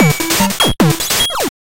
HardPCM ChipRemix #-15-194701720
Breakbeats HardPCM videogames' sounds